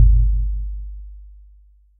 deep drum
I simply generated a Risset drum in audacity with all the default settings, except the frequency is 50. A really powerful sound, but only if you have a good sub woofer.
risset, deep-drum, drum, low